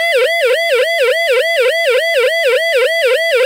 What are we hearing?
Siren Loop
A mono loopable recording of a siren. Recorded from a broken dynamo charging torch/radio/siren/strobe thing found in a skip. Yes, I am a skiprat and proud of it.
mono, loop, alarm, siren